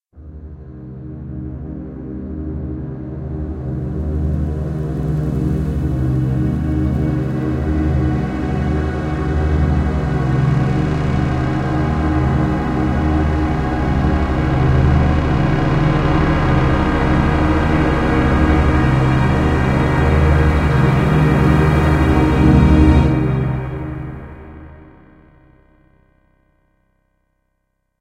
Eerie Dark Drone Soundscape
A taunted dark drone atmosphere, spooky string resonations with a disjointed piano in the background. A tense sound, perhaps for a scene of reflection or a disturbing discovery. Flickering black and white images.
Created in Ableton Live.
anxious, bass, deep, dramatic, drone, ghost, piano, scary, spooky, suspense, sweep, taunting, terror, violin